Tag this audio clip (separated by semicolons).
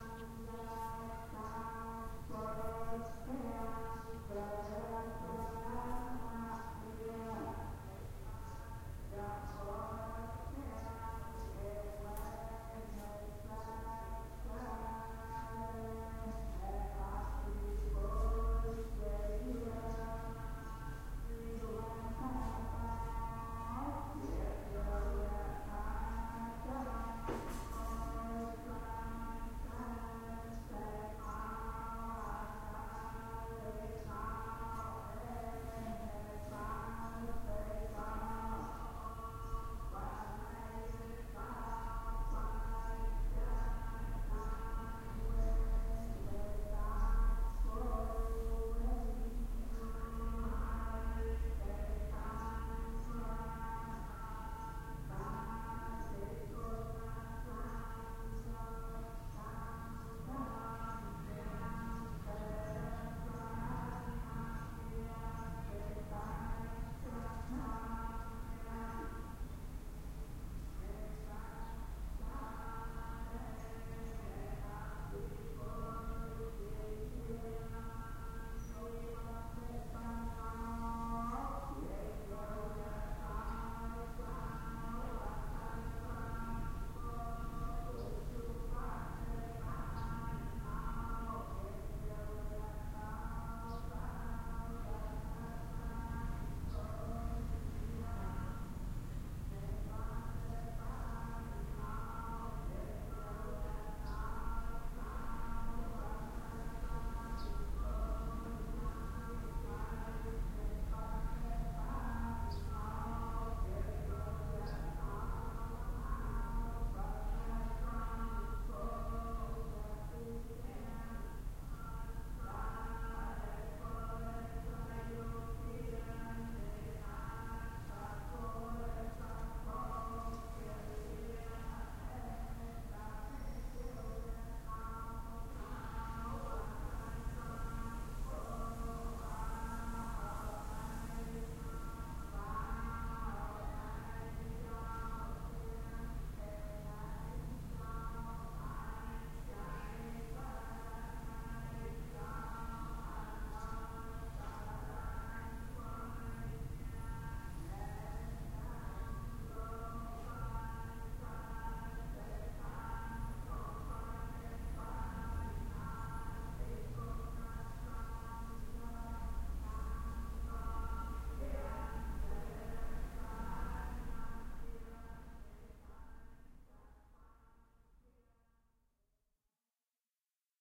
buddhist; cambodia; chant; monk